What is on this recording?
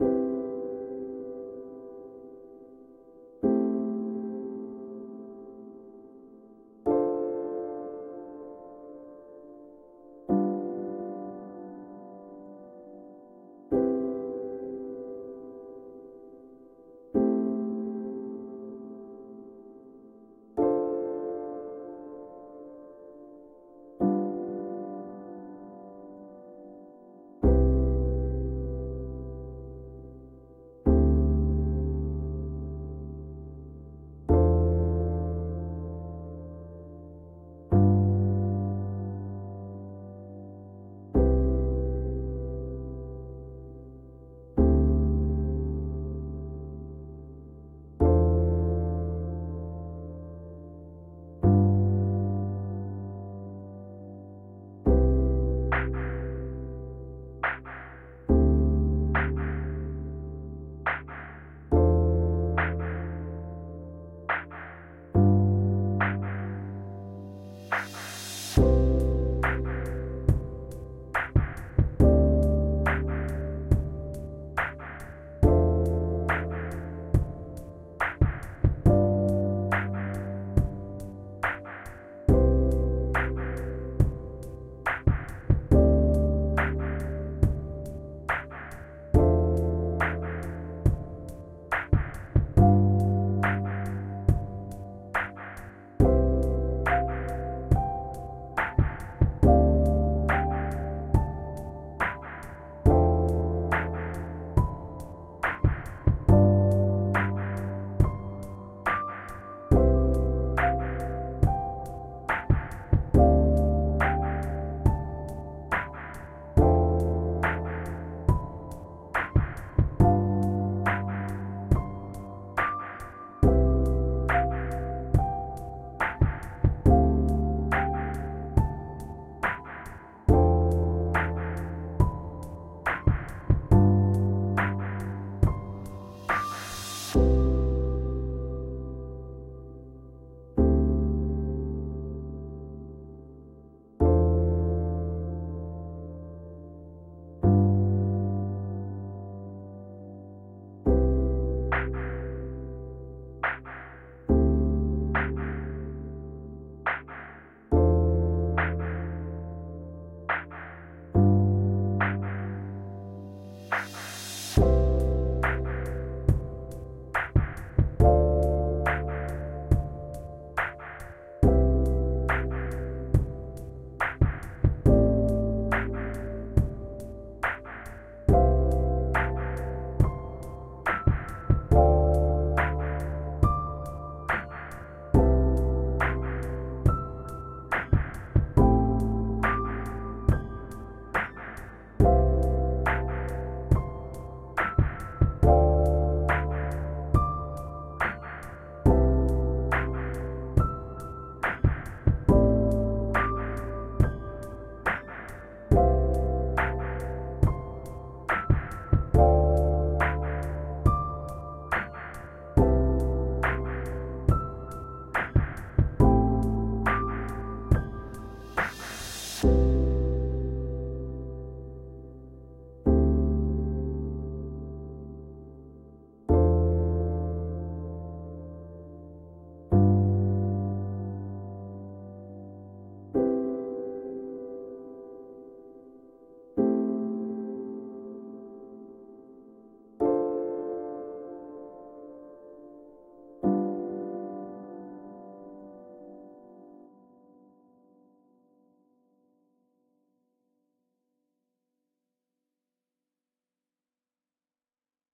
Chill Lofi piano music
An experimental Lofi piece written by me and ChatGPT. I mostly did the drum work and the mixing while ChatGPT did the piano. This song is scanned and no match was found so working with ChatGPT was certainly interesting!
Made with FL studio 21.
Chill, loop, piano, drum-loop, chords, rhythm, drums, Lofi, 70-bpm, beat